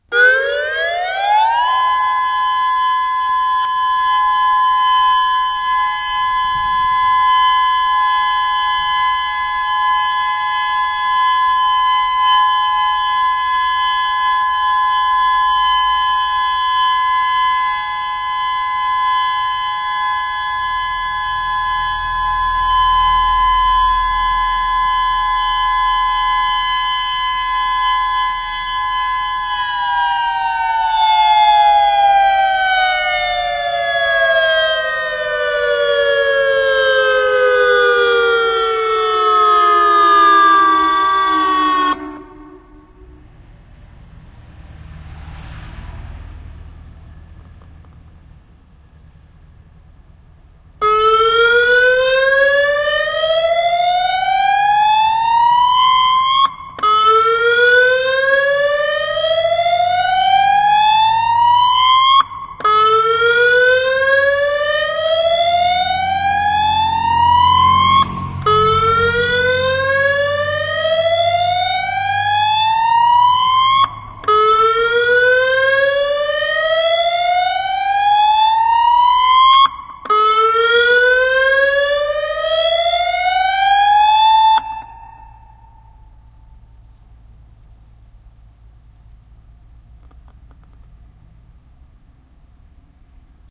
9-1-09 CID MOD6024 test
Federal Signal MOD6024 sounding a 40 second alert and for the first time, a whoop tone HAZMAT signal unique to the area for chemical spills. Siren is located on Kauhi street, 200 yds West of Kalaeloa Blvd.
air, alert, civil, defense, disaster, emergency, federal, hawaii, hazmat, honolulu, hurricane, manoa, mod6024, modulator, outdoor, raid, signal, siren, test, tone, tornado, tsunami, warning, whoop